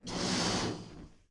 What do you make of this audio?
These were made for a special kit i needed for school. They are the inspired by "in just" by e.e. cummings.
inflating, loud, unprocessed, inflate, growing, balloon